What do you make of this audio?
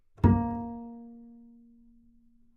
Double Bass - A#3 - pizzicato
Part of the Good-sounds dataset of monophonic instrumental sounds.
instrument::double bass
note::A#
octave::3
midi note::58
good-sounds-id::8742